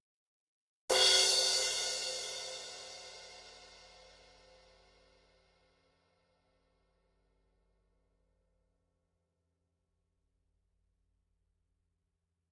Paiste 2002 17" Crash Softer Hit - 2009 Year Cymbal
Paiste 2002 17" Crash Softer Hit
Hit, 2002, 17, Crash, Softer, Paiste